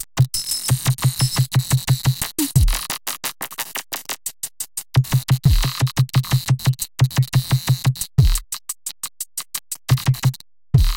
Glitch Drums 003
Drums, Glitch, Loop, Sample